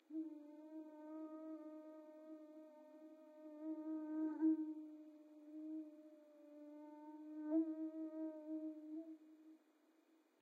Remix 36926 dobroide 20070628-mosquito
An attempt to attenuate the crickets in dobroide's recording.
request, insect, buzz